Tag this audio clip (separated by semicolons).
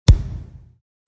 concrete; concrete-wall; concretewall; crack; fist; hand; hit; hits; human; kick; knuckle; pop; slam; slap; smack; thump